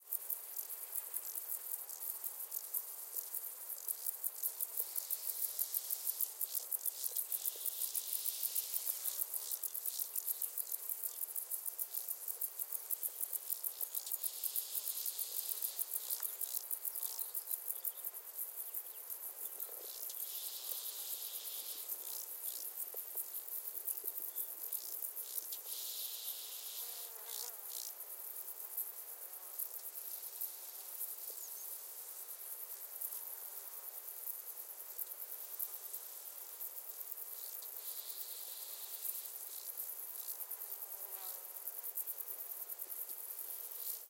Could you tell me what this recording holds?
Insects in the grass.
This file has been recorded in the Pyrenees (mountains located in the south-west of France) during a sunny and quite windy day. You can hear crickets and insects in the grass, and some distant small birds.
Recorded in July 2015, with an Olympus LS-100 (internal microphones).
High-pass filter 400Hz -48dB/oct applied in Audacity.